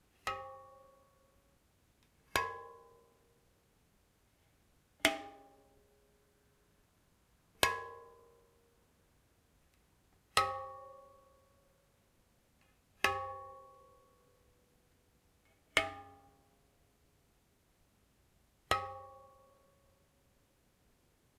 bike wstrings
Bicycle spokes used as an instrument
bicycle, bike, spokes, wheel